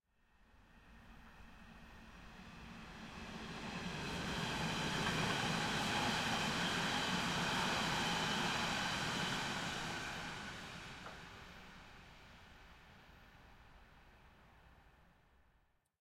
S-Bahn Train passing close 2
S-Bahn city train passing aprox 5m away. Recorded in 90° XY with a Zoom HD2 at Priesterweg, Berlin
autumn, city, electric-train, field-recording, passenger-train, rail-road, rail-way, s-bahn, train